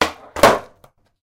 skateboard trick called kick flip